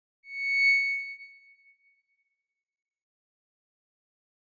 High pitch sound